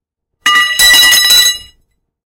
Metal Bar 1
A metal bar clanging to a concrete surface. Recorded on my Walkman Mp3 Player/Recorder. Digitally enhanced.
bar, clang, copper, drop, iron, metal